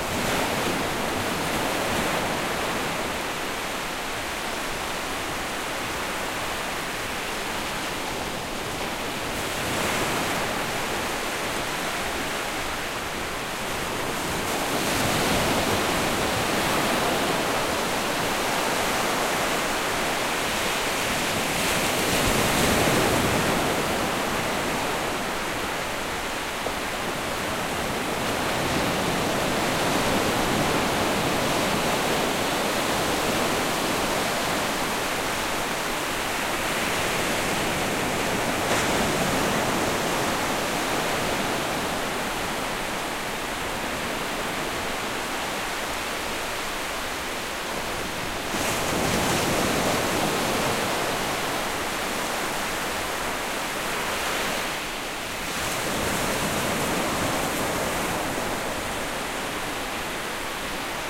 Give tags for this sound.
beach sea waves ocean night field-recording

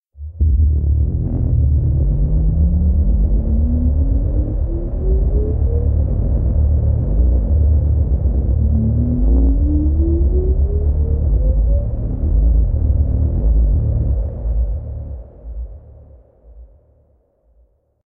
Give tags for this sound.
ambient artificial drone experimental soundscape space

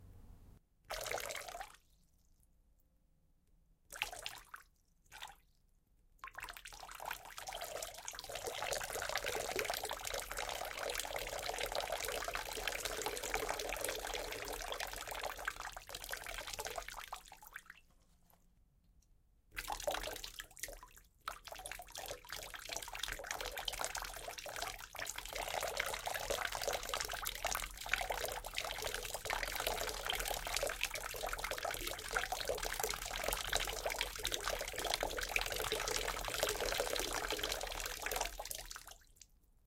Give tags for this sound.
pouring-water water-droplets water-sound

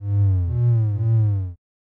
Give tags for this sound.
sub grumble loop club breaks bass free super

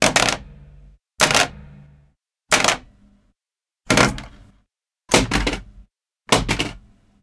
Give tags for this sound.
computer drop dropping fall mat mouse room table

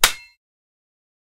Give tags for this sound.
main Main-Menu Menu sound

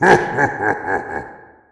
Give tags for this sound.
ghost monster halloween spooky evil horror haunted laugh scary

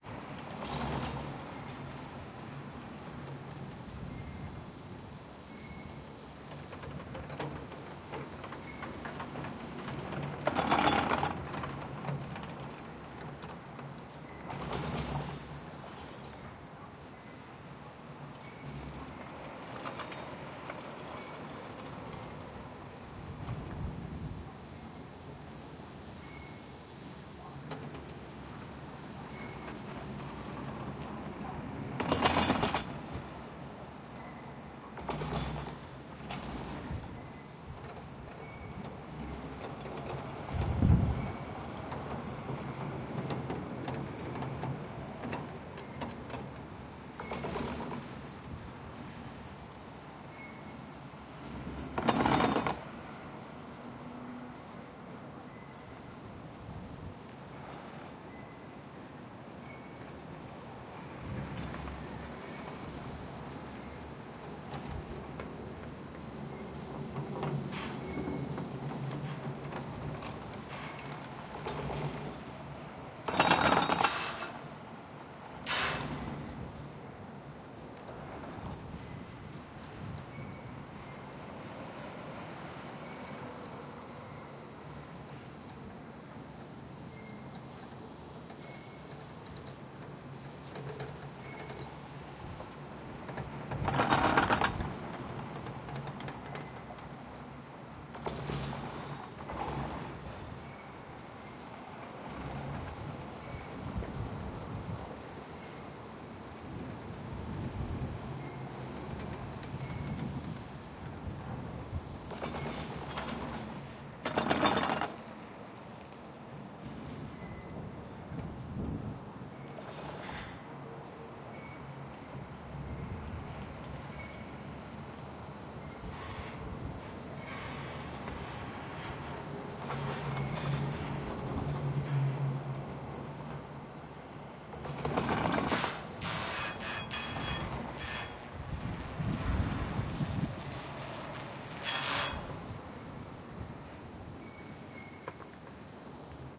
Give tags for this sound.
city soundscape field-recording industrial atmosphere cableway ambience urban

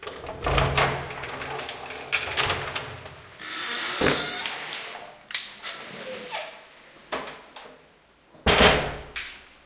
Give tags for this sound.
door house lock metal

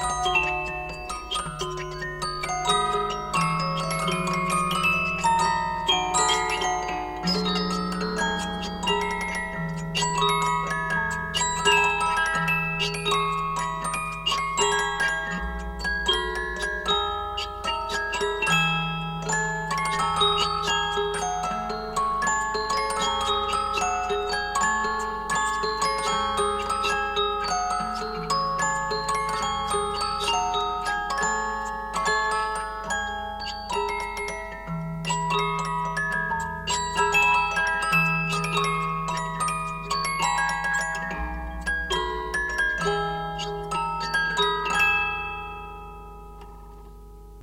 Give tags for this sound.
saga music

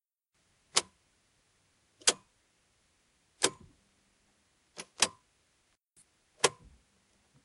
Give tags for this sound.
boton mecanico

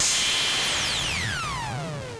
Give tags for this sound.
symetrix-501 metasonix-f1 tube future-retro-xs

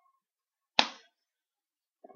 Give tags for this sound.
Fight Hit Skin

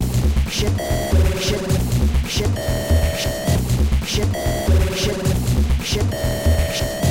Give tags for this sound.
hard industrial loop loud techno

